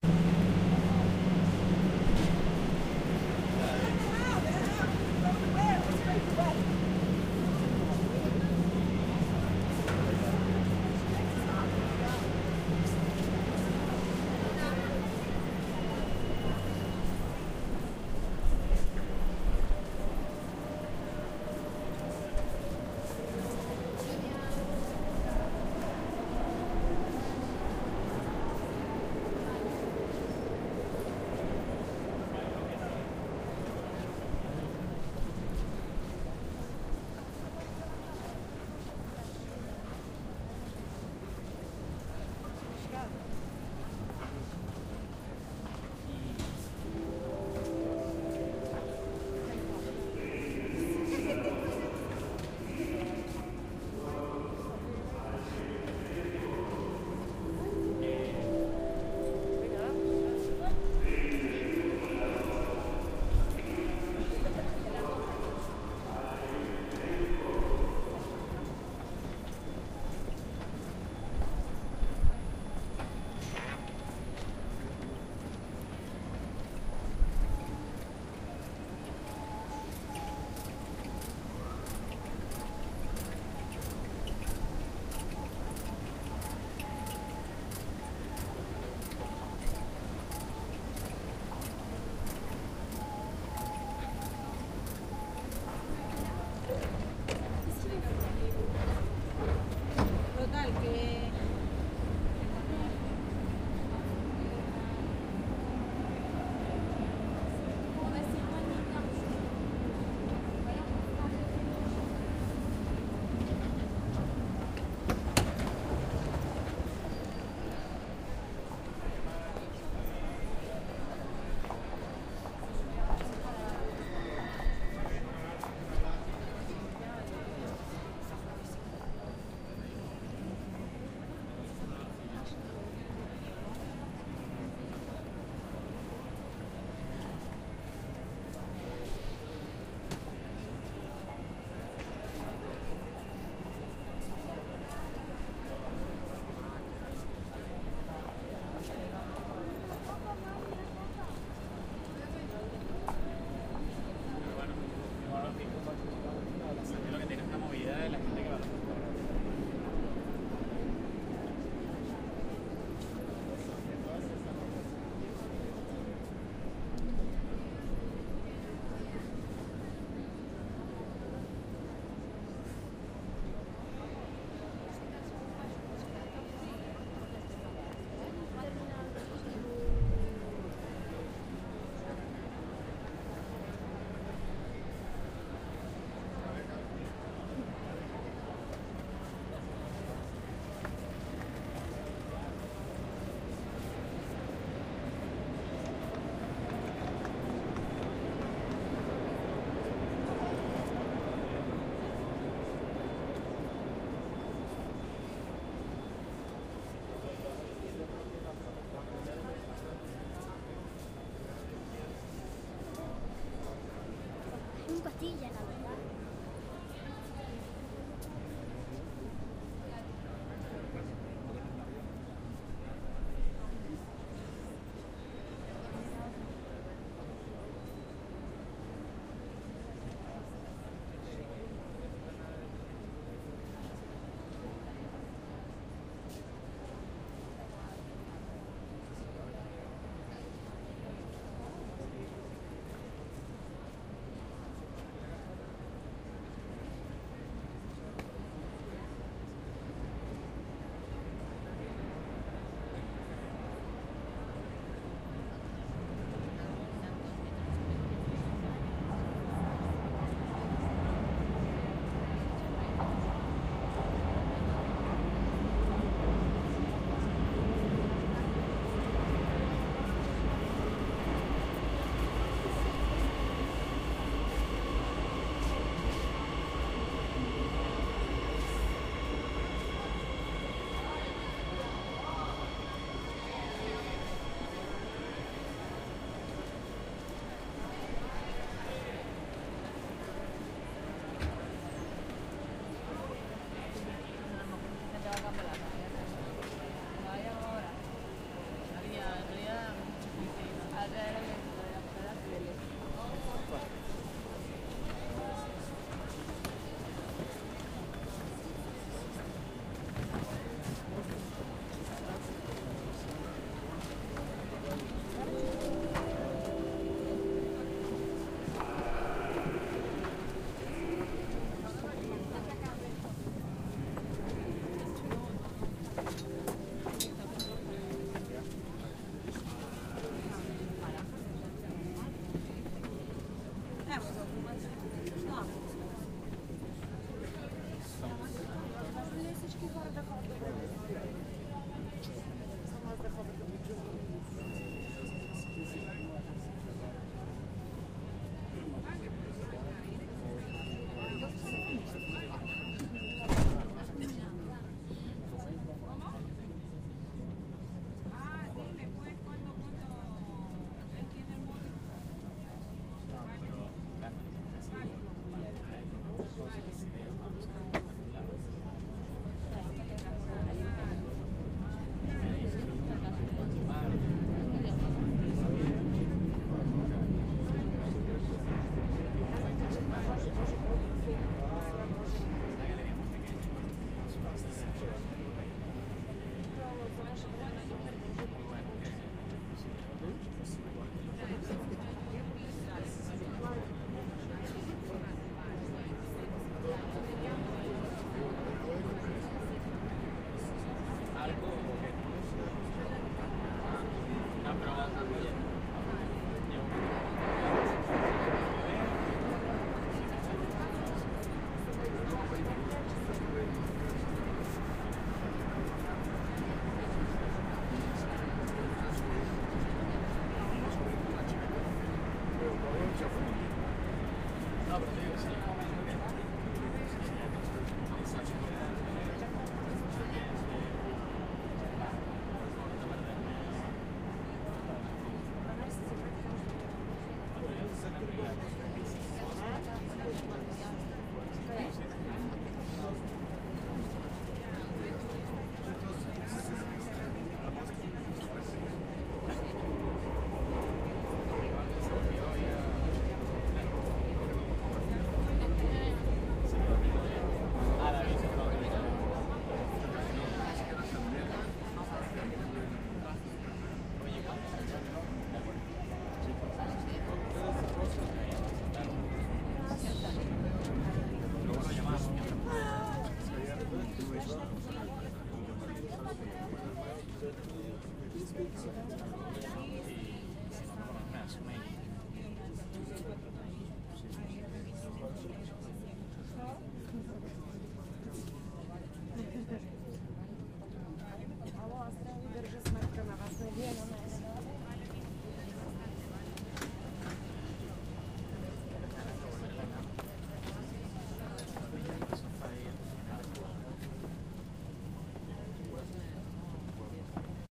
A walk inside one of the new metropolitan stations of Madrid, Spain. You can hear people walking, mechanic stairs, trains, voices of speakers, train arriving, people entering the train and the start of the travel.
Sound recording by Juan Jose Dominguez.